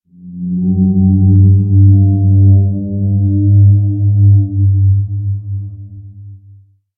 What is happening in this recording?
rnd moan31
ambient organic moan sound
ambience, ambient, atmosphere, dark, horror, moan, organic, outdoor, random